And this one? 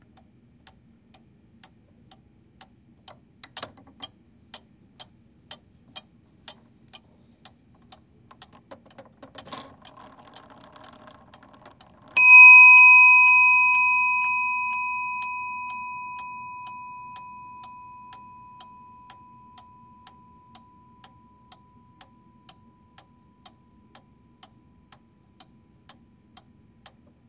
Another of my grandmother's clocks chiming - this one is a single bell-like chime and the sound of the pendulum.
chime, antique, clock